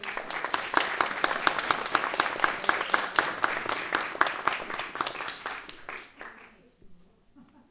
small group applause 4
About twenty people clapping during a presentation.Recorded from behind the audience using the Zoom H4 on-board microphones.
applause, audience, clap, field-recording, group